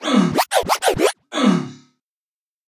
Scratch Own old uh! 1 - 1 bar - 90 BPM (no swing)
Acid-sized sample of a scratch made by me with the mouse in 1999 or 2000. Baby scratch. Ready for drag'n'drop music production software.
I recommend you that, if you are going to use it in a track with a different BPM, you change the speed of this sample (like modifying the pitch in a turntable), not just the duration keeping the tone.
Software: AnalogX Scratch & Cool Edit Pro 2.1
Sound: recording of my own voice with postproduction echo
acid-sized, dj, hiphop, scratching, hip-hop, scratch, 90, rap, scratch-it